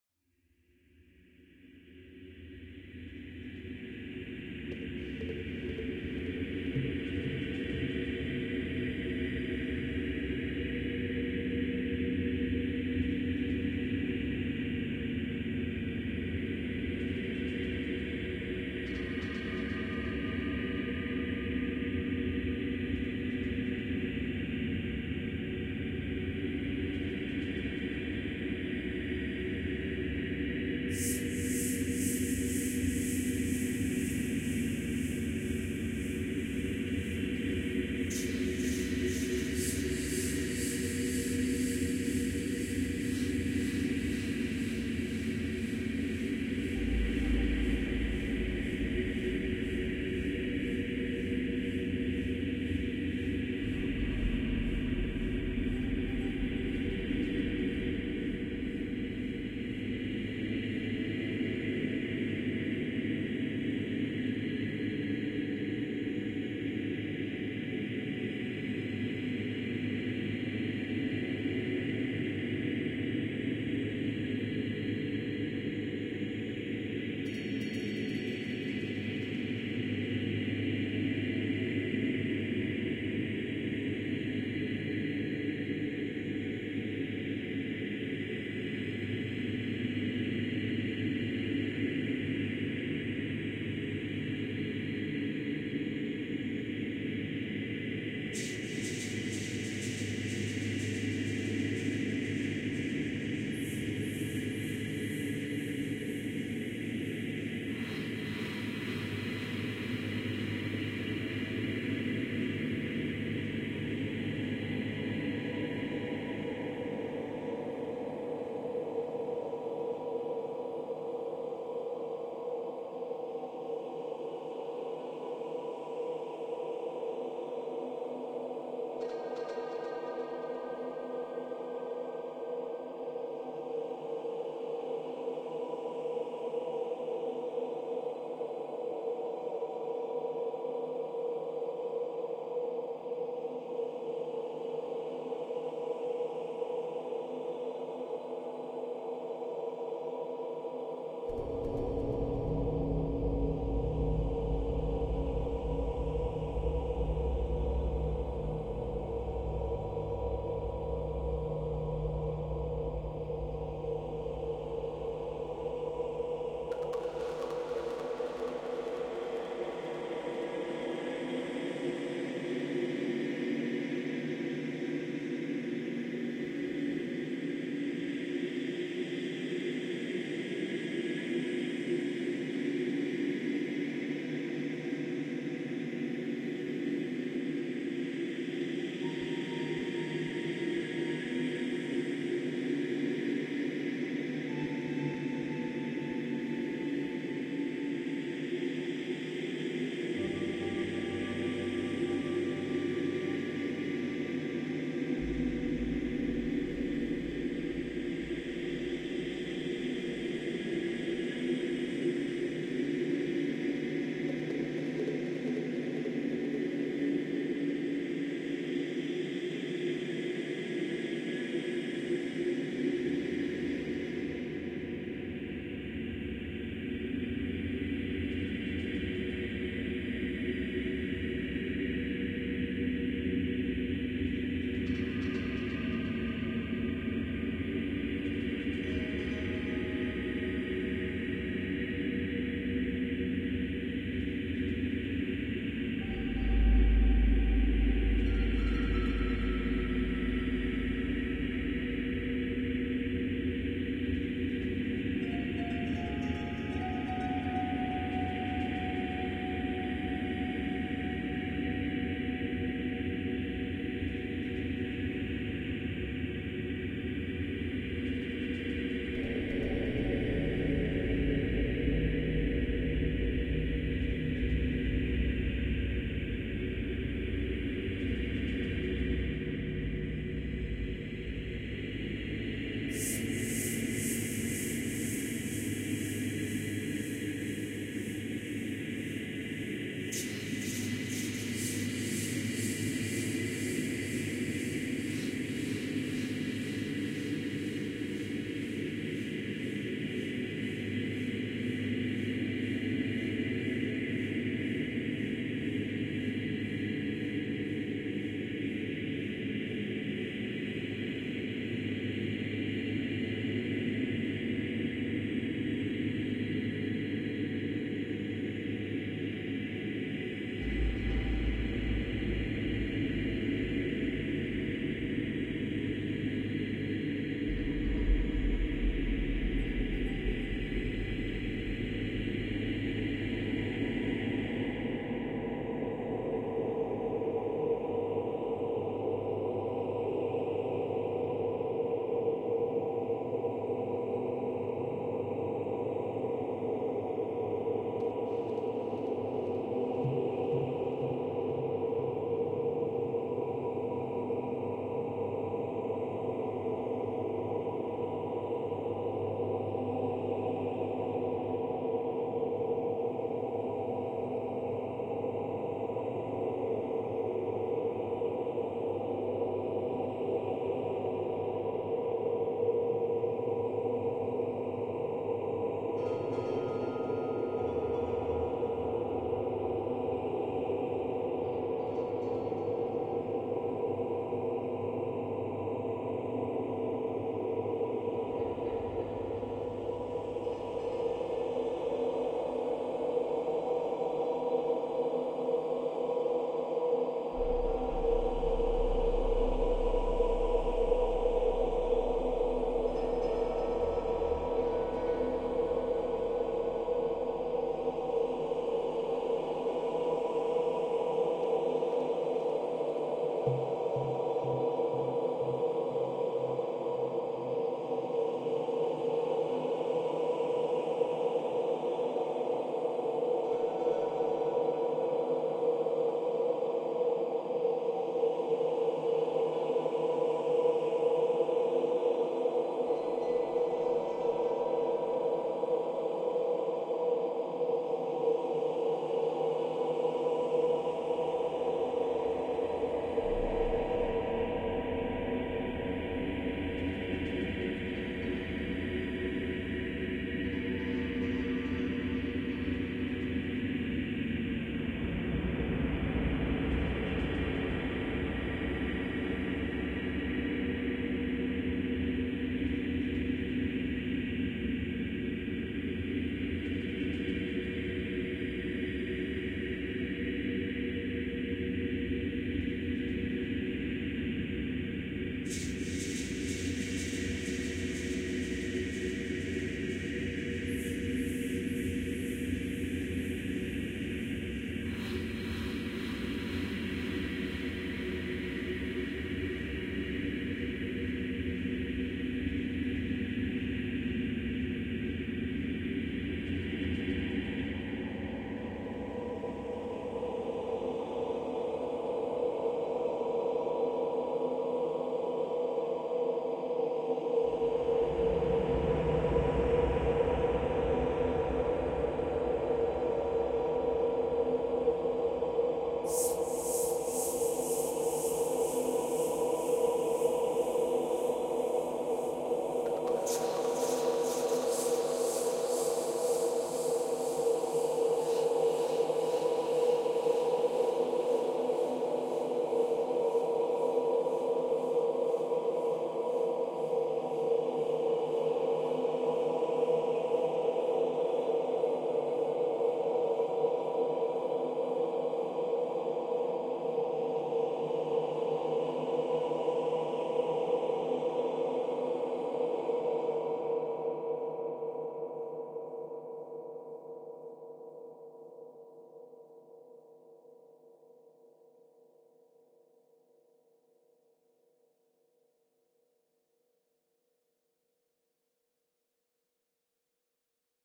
I made this because I am a huge fan of horror in general. It's free for everyone even if you are a billion dollar company. I only ask for some credit for my work but then again I can't stop you from not doing so :-)
Thank you and have fun!
Also visit my other pages: